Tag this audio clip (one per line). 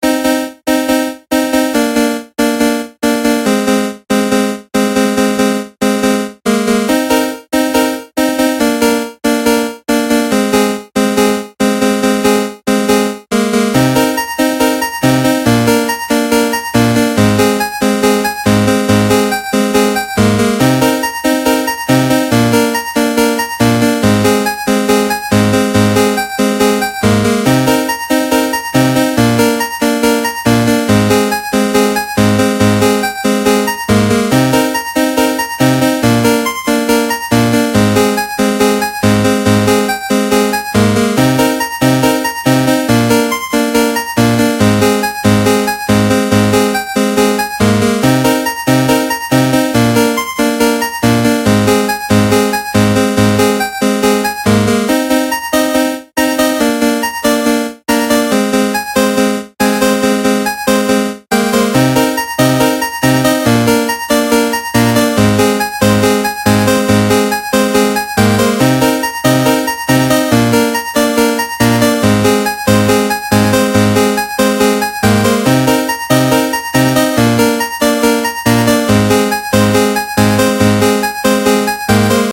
8bit
cheap
chiptunes
drumloops
free
game
gameboy
glitch
loop
nanoloop
synth
video
videogame